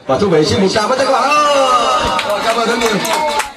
This sound belongs to a sample pack that contains all the sounds I used to make my Vietnam mix. (I'll post more info and a link on the forum.) These sounds were recorded during a trip through Vietnam from south to north in August 2006. All these sounds were recorded with a Sony MX20 voice recorder, so the initial quality was quite low. All sounds were processed afterwards. This was recorded on the most terrible boat trip I have ever been on. The captain is determined to entertain us. Have fun or drown...
announcement, asia, sound-painting, vietnam